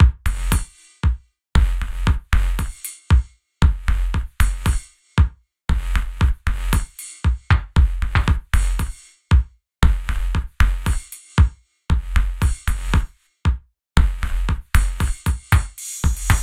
reverb short house beat 116bpm